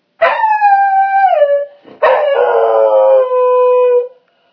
Dog Extended Howl
I recorded my dog barking after I hit a single note on my piano. Recorded using my ipad microphone, sorry for the lack of proper recording. I figured I would just nab it while he was feeling talkative!